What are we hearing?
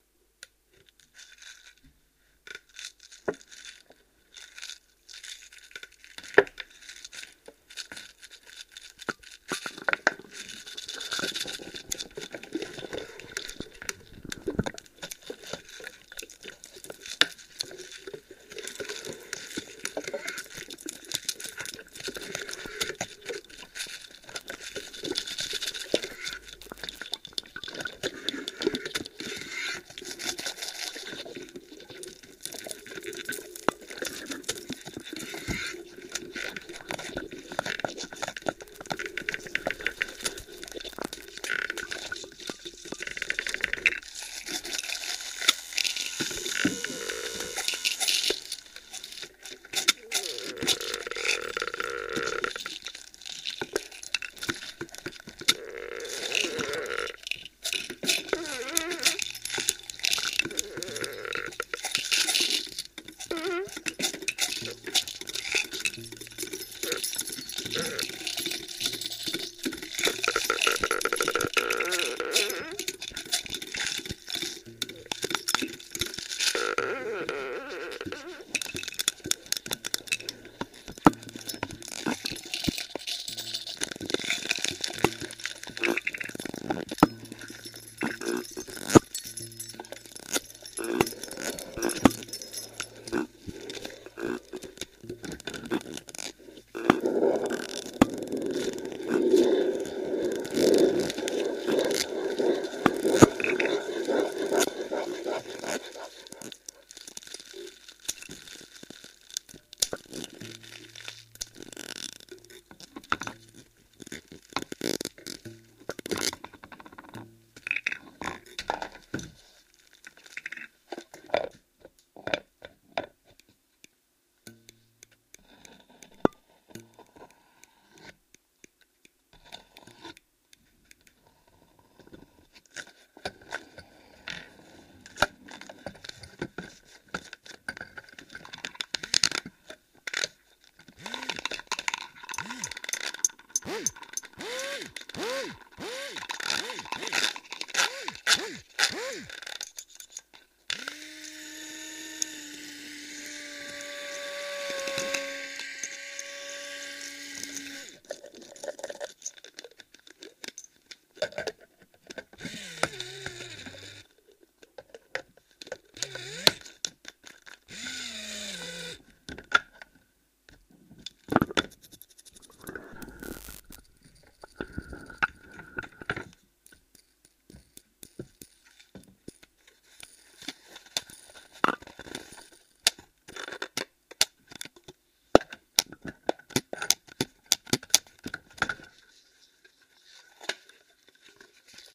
A long stereo mix of all the wacky desktop sounds. I have absolutely no idea what this can be used for :)
household, mad, effects, crazy, long, multiple, sounds, desktop, noise, mix, weird, workshop, stereo